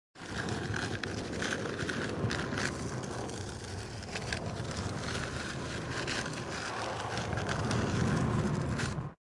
Simulation of a heavy object being dragged or pushed across the ice. Effect was created by dragging a small block of wood across a tolex covered surface, overlaid with sound of fingernail dragging across the bottom of an empty, but icy ice cube tray. Recorded with an SM57 dynamic mic
drag, dragging, heavy-object-drag, Ice, ice-drag, push, pushing